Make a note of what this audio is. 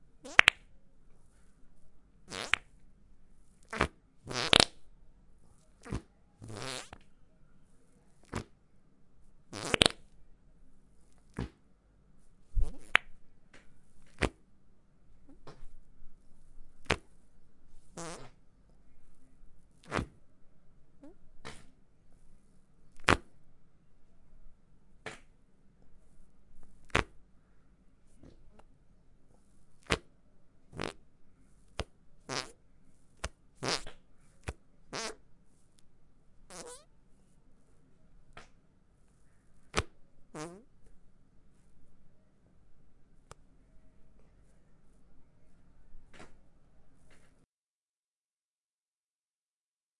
ultimate-farts2
While doing sit-ups in my bedroom - I've discovered that the friction of my back with the floor makes hilarious "fart-like" sounds. I grabbed my Zoom h4n recorder - and recorded some. Good fart sounds are sometimes not so easy to find :)
(This is file 2 of 2. Each was recorded in a different rec. level...)
2, fart